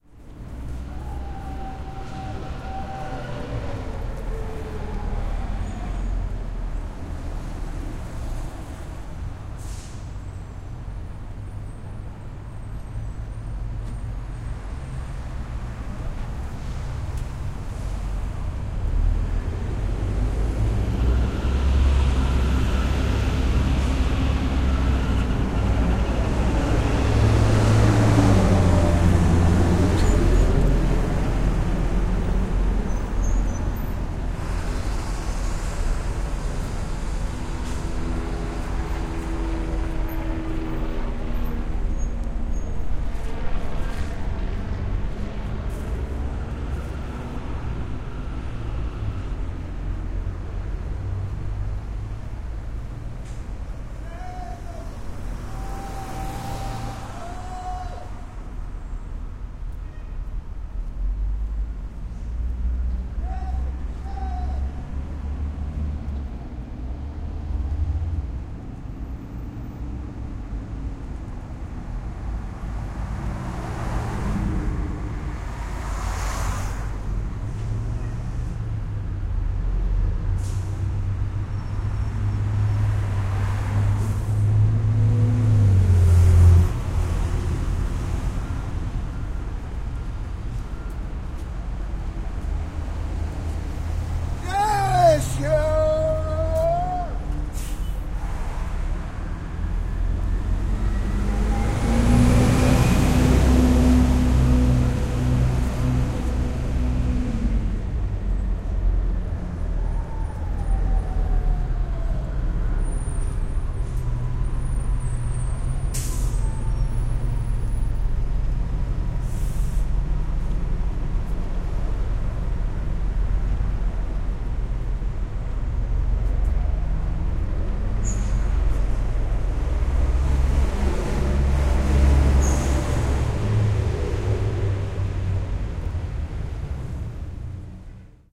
file0133edit LA1030060640
10/30/6 6:40am - downtown los angeles ambiance from sidewalk - buses pass by - a homeless guy looking through trash cans sings loudly
ambience, morning, city, downtown, singing, homeless, field-recording